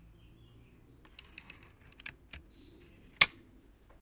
Recorded with: Zoom H6 (XY Capsule)
Reloading/Cocking of an air rifle.
airsoft, rifle, cocking, rifle-reload, reload, gun, cock, air-rifle, load